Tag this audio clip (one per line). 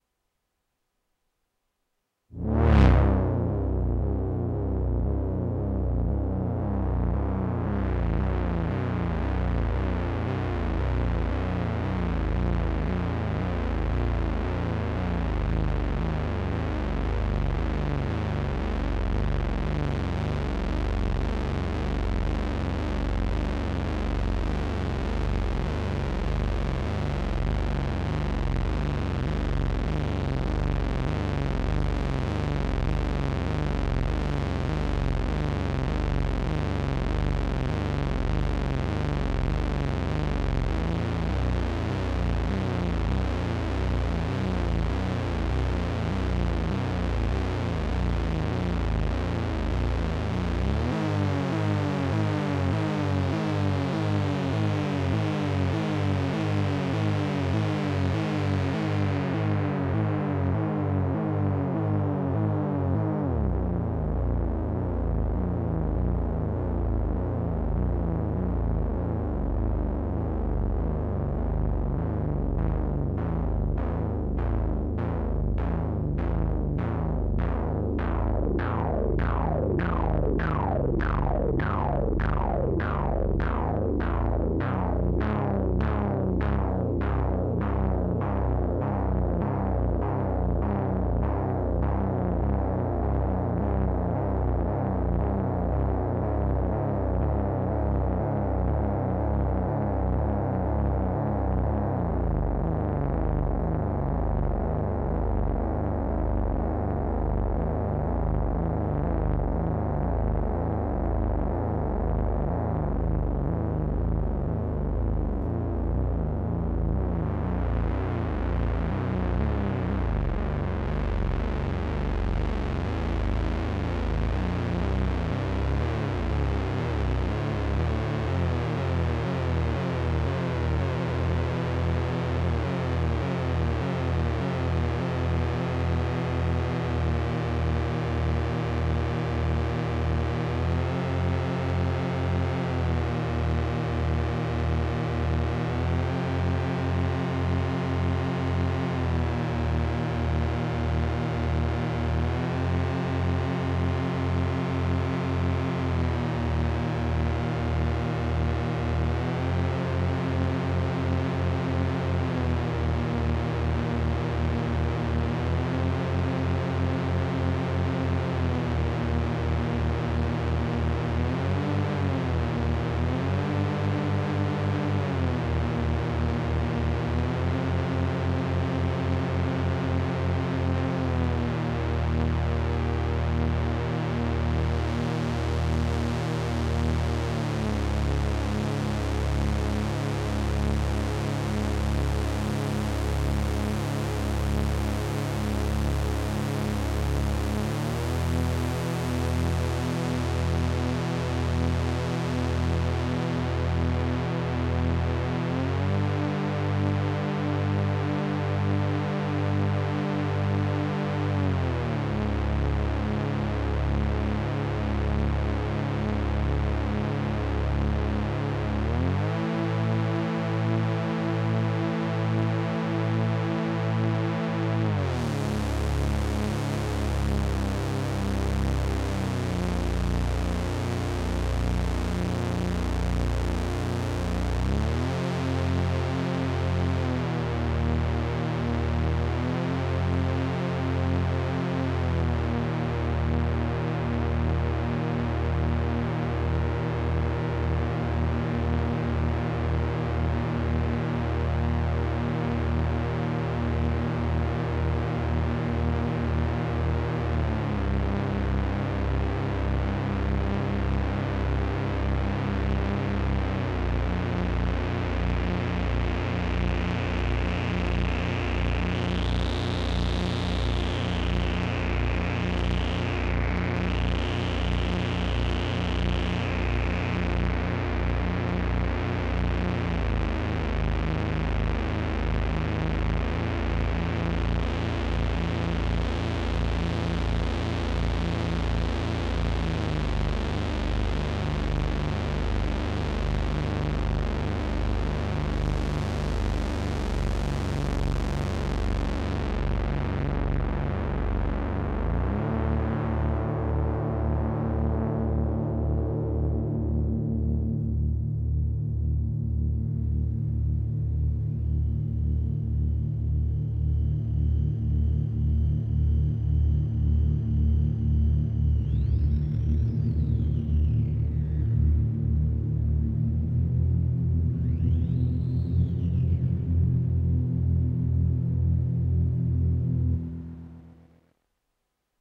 ambience
sci-fi
soundscape
synth